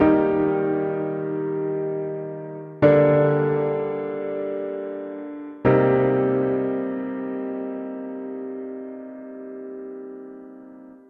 Loop Reflections 03
A music loop to be used in storydriven and reflective games with puzzle and philosophical elements.
game, gamedev, gamedeveloping, games, gaming, indiedev, indiegamedev, loop, music, music-loop, Philosophical, Puzzle, sfx, Thoughtful, video-game, videogame, videogames